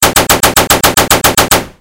Assualt Rifle Shooting3
I created this sound with a small sample made by "pgi's" which I reused it multiple times right after another and changed the speed to create this amazing sound.
War, Machine-Gun, Light-Machine-Gun, Sub-Machine-Gun, Action, Video-Game, Shooting, Firearm, Battle-Field, Call-Of-Duty, Realistic, Game, Rifle, Battle, Gunshots, Assault-Rifle, Modern-Warfare, pgi, Fire-Fight, Combat, Gun, Shots, Weapon